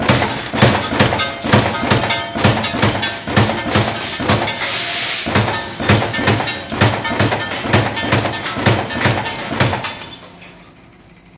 die, industrial, machine, factory, field-recording, metal, processing
die, factory, field-recording, industrial, machine, metal, processing